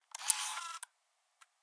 sl50 power on
Samsung SL50 powering on
samsung, photo, sl50, camera, power